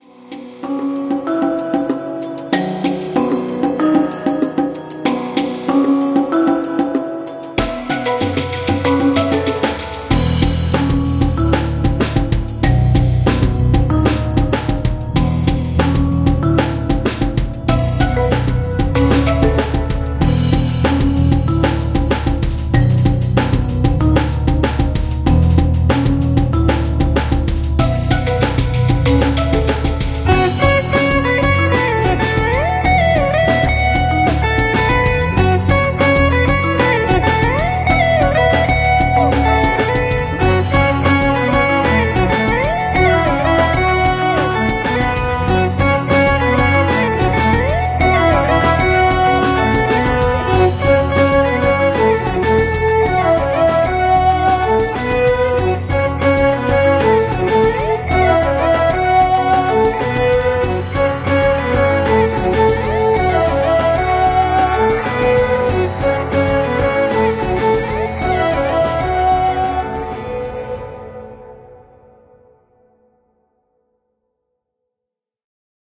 Exploration Song
A happy and adventurous ambient world song with exotic use of different instruments.
Hifi, suitable for professional projects.